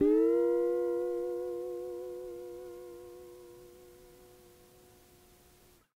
Tape Slide Guitar 7
Lo-fi tape samples at your disposal.
mojomills, slide, tape, collab-2, guitar, lo-fi, vintage, Jordan-Mills, lofi